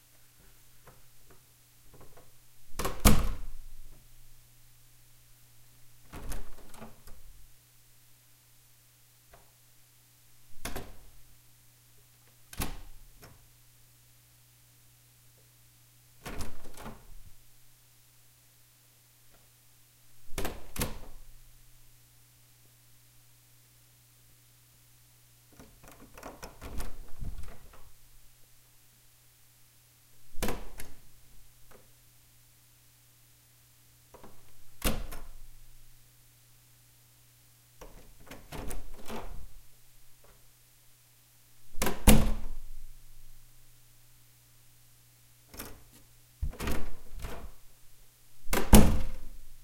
Various takes of opening and closing a large wooden door. It is rather and hard to slam. It rattles.